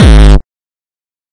Distorted kick created with F.L. Studio. Blood Overdrive, Parametric EQ, Stereo enhancer, and EQUO effects were used.
bass, techno, distorted, kick, synth, drum, trance, hard, beat, distortion, hardcore, progression, drumloop, melody, kickdrum